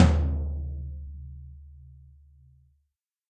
YamahaStageCustomBirch6PlyTomLow14x14
Toms and kicks recorded in stereo from a variety of kits.
acoustic, drums, stereo